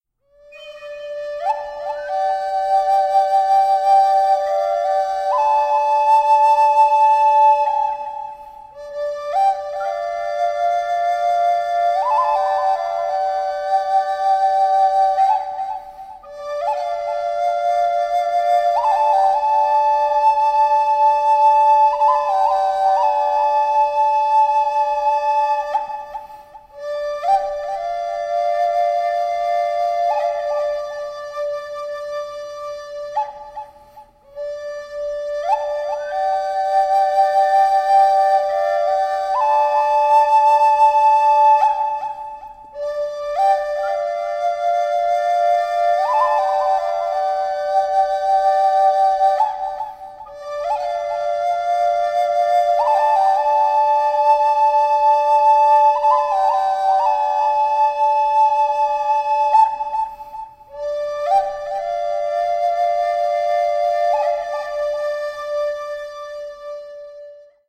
D5 Native American Style Flute 2
This is my D5 Native American Style backpack flute. I have had some problems with a few who use my stuff as their own and when someone else wants to use it they can't. It is a big mess to get cleared up. So please, please do the right thing so whoever wants to use it can.
D5 flute with a drone overlay.
Enjoy
unplugged, canyon, style, soothing